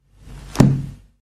Closing a 64 years old book, hard covered and filled with a very thin kind of paper.
household, percussive, noise, paper, lofi, book, loop